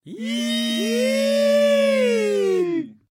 sound representing a reaction for mistake situations, recorded by shotgun microphone a d vocal
Gravado para a disciplina de Captação e Edição de Áudio do curso Rádio, TV e Internet, Universidade Anhembi Morumbi. São Paulo-SP. Brasil.